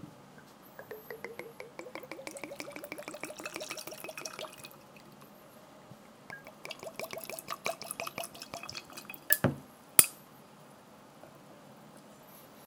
pouring whisky to two glasses recorded by a smartphone.
glass, liqud, pouring, whisky